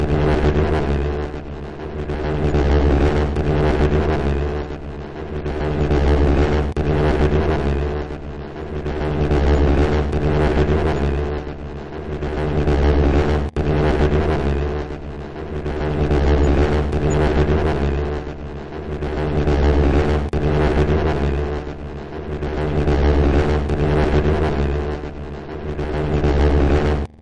bits or fragments of sound or music that can be good to have in your toolbox.
bits, fragments, lumps, music, or, sounds